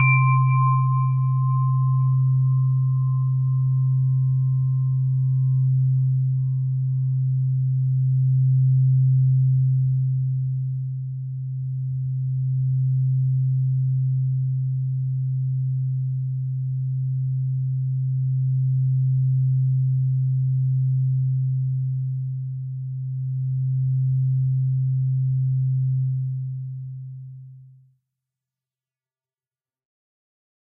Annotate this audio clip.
created with synthesizer